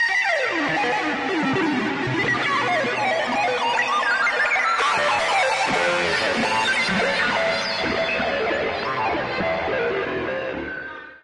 Electric Guitar 7
An emulation of electric guitar synthesized in u-he's modular synthesizer Zebra, recorded live to disk and edited in BIAS Peak.
guitar, synthesizer, blues, Zebra, electric, rock, metal, psychedelic